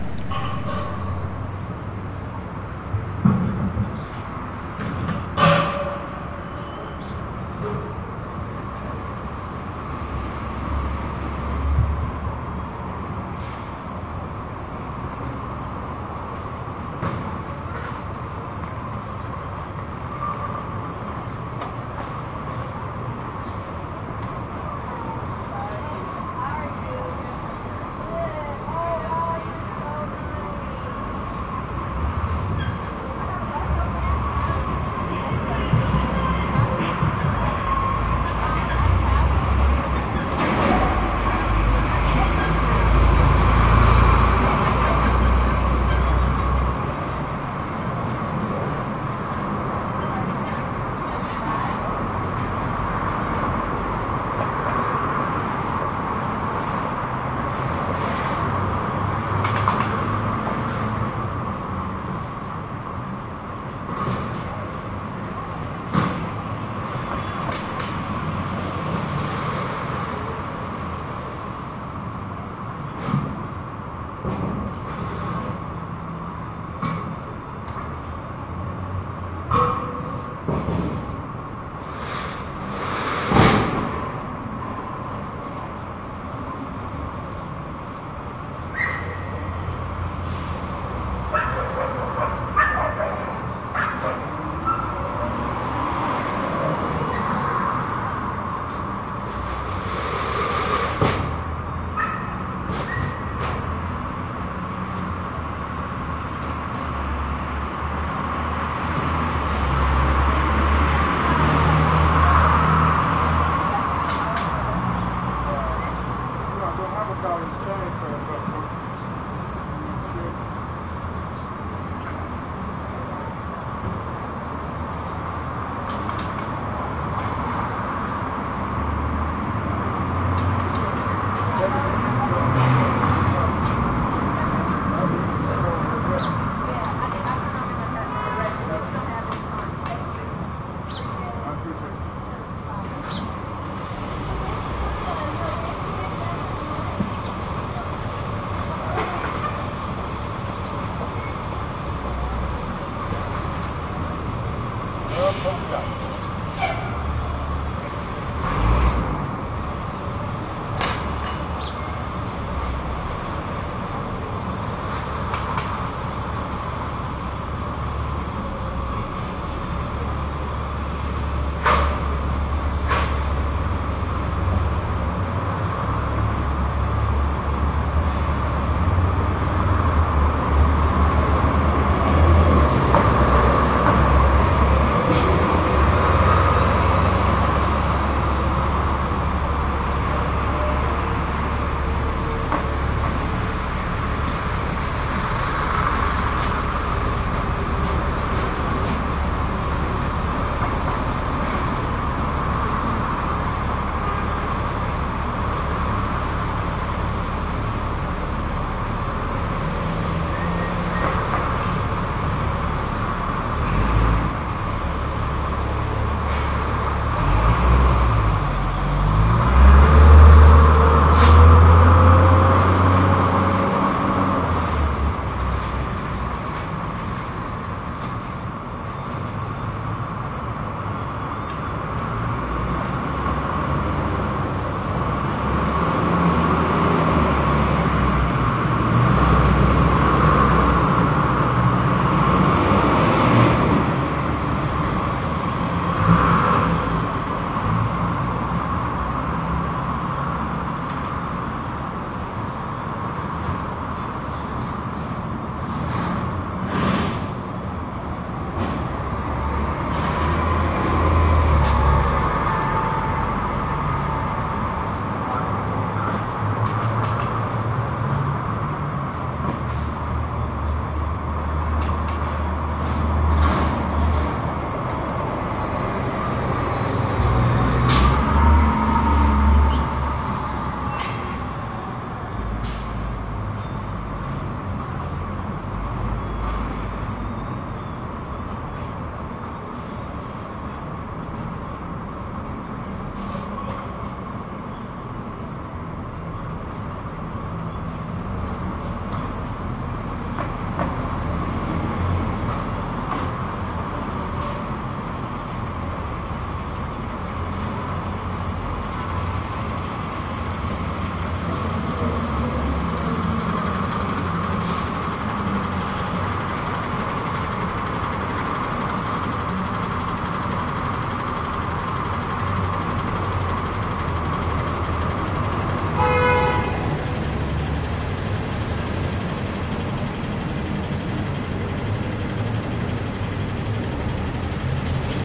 Downtown LA 09
One in a set of downtown los angeles recordings made with a Fostex FR2-LE and an AKG Perception 420.